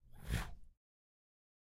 igniting fire sound